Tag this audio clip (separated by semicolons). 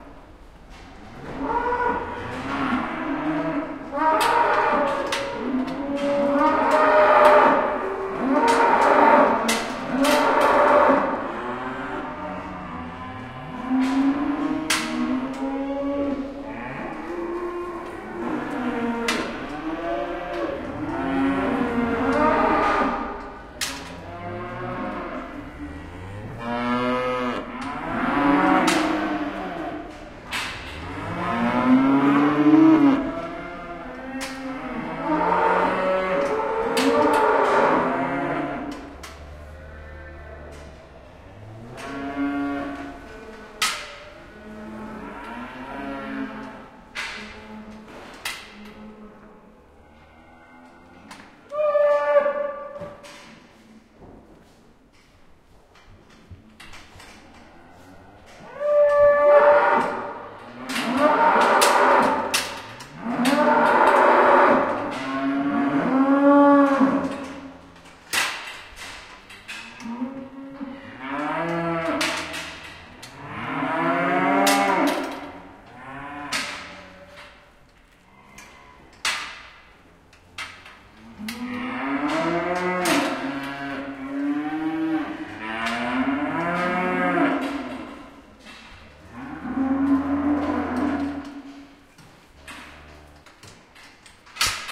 2020; Cows; Farm; Jylland; Metalic; Stable